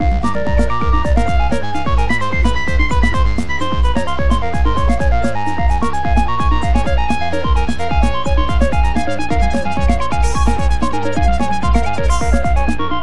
Fragment of algorithmically generated music that me and two of my colleagues made for a the video game called Puckr (video here, although the music featured in the video is not the music we made). Puckr was an adaptation of air hockey for the Reactable, and features different playing levels as the game advanced. The other sounds in this pack show an example of the music that was played for each level.
The music is generated using Pure Data, a software for music processing nerds. We defined a set of rules for the generation of notes and drum patterns and then the system does the rest automatically and creates an infinite stream of music. Here I just recorded a number of bars.